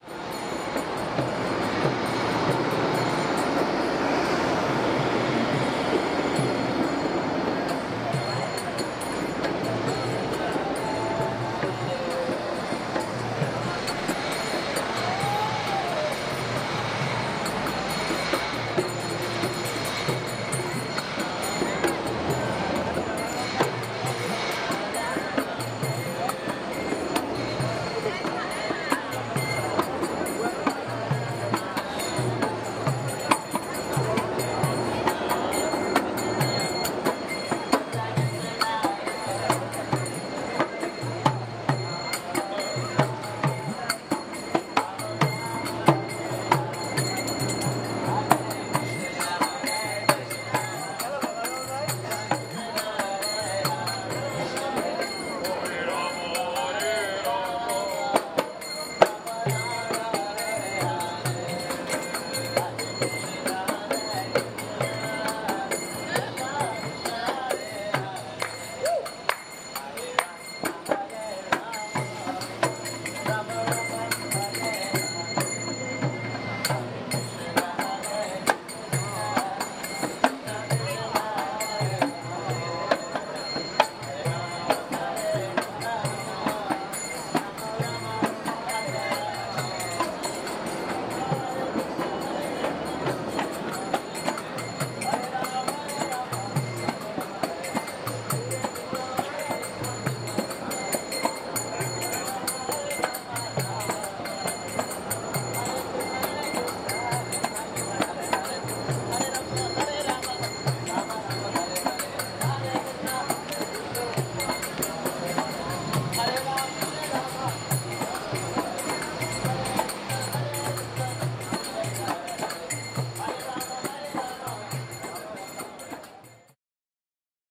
Hare krishnas on busy street
Recorded on Marantz PMD661 with Rode NTG-2.
Two Hare Krishnas dancing and chanting their way down down the opposite side of Oxford street in London one busy afternoon.
drum, hare-krishnas, busy, field-recording, cymbal, rama, oxford, hare, krishna, chant, street, ambiance, London, city, dance